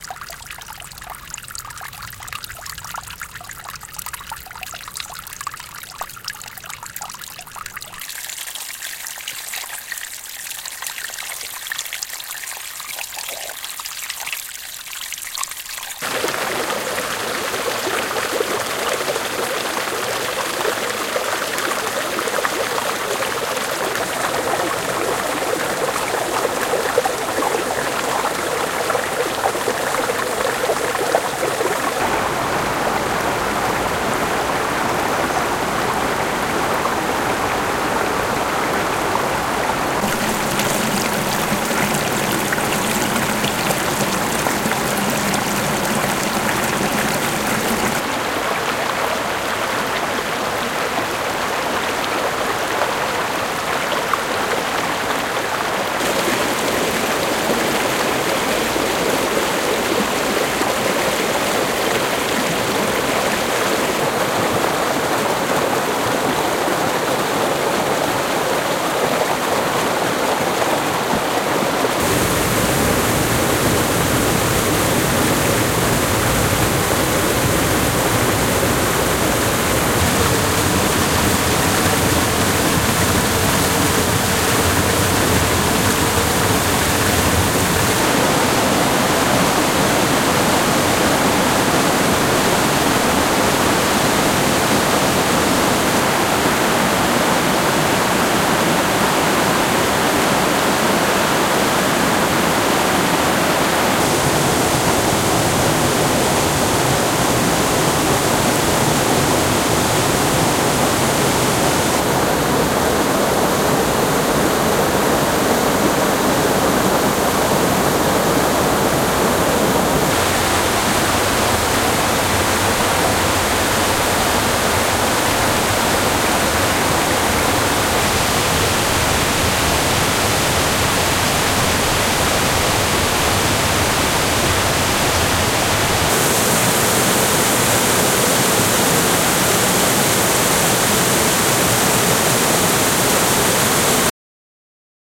Morphagene Waterfall Reel
The latest in our series of Noise Reels for the Morphagene, this is a collection of waterfall sounds captured with a Zoom H6 from a variety of locations along Skinny Dip Falls in western North Carolina.
The Splices on the Reel have been arranged in terms of intensity: starting with a tiny drip and ending with a massive roar.
Experiment and have fun!
Other Reels in our Noise series: